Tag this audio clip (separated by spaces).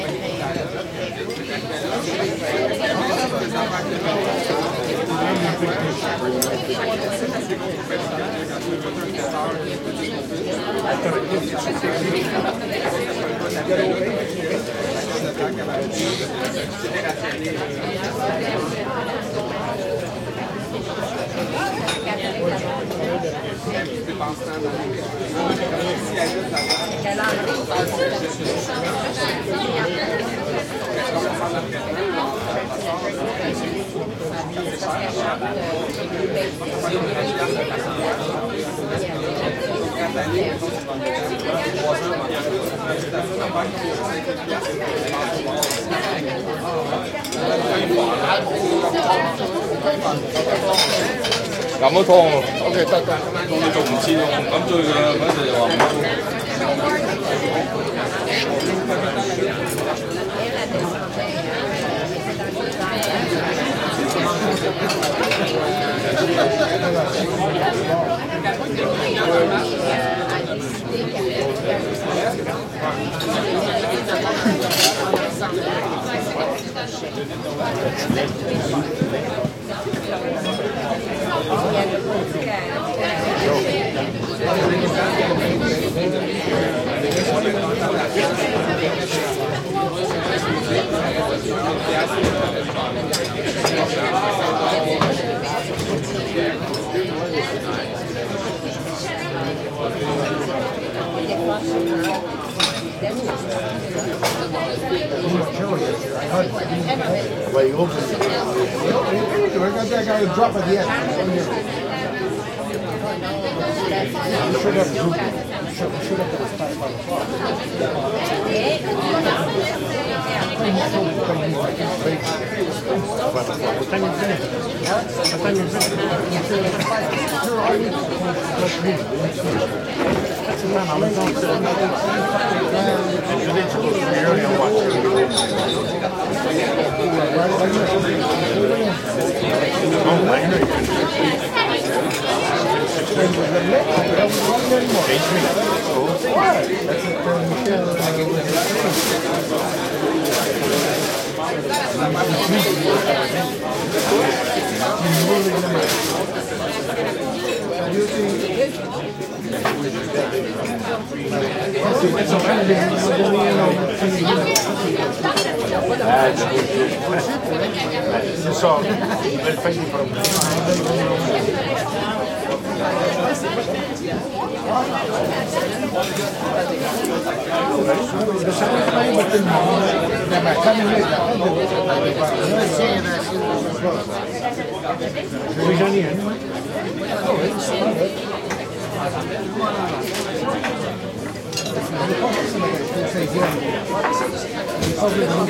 restaurant medium busy Montreal mandarin quebecois Canada chinese crowd int voices